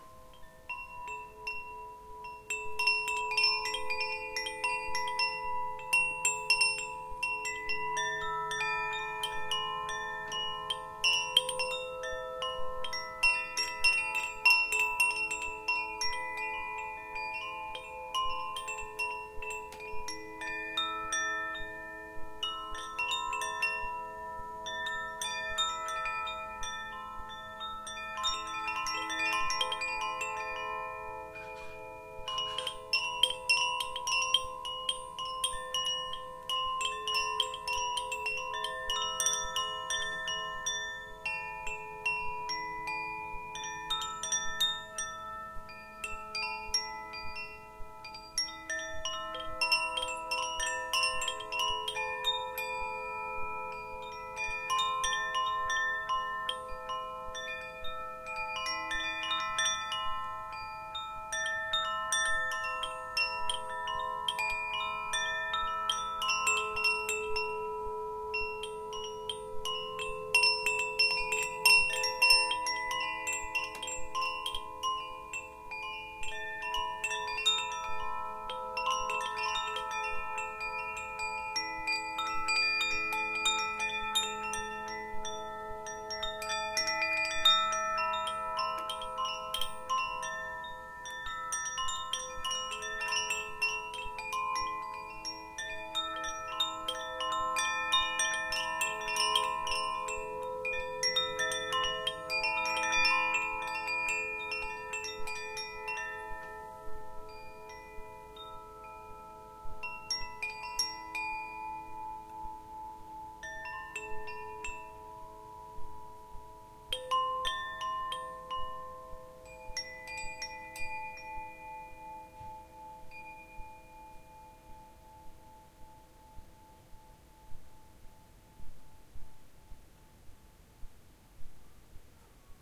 Melodic wind chimes; faint wind noise in the background.
chimes, windchimes